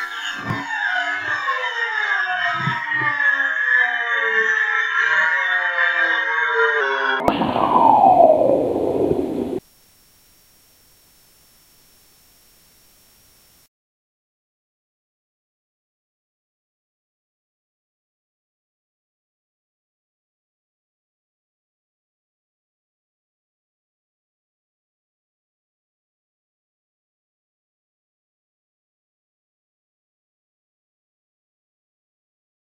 plane crashing
sound of a nail going down a guitar string
effects, funny, games, sfx, sound